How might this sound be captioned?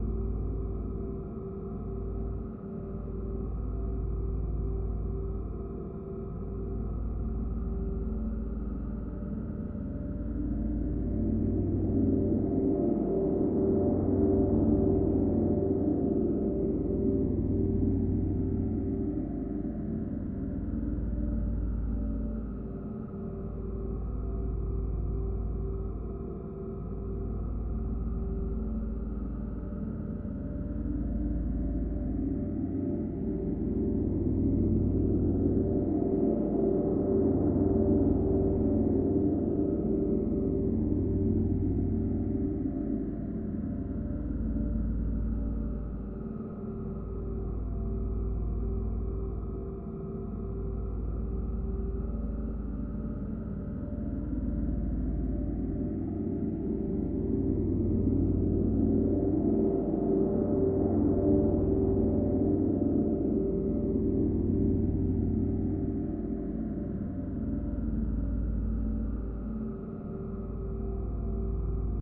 An ambient sound for the sokobanned porject. You can use it if you want :)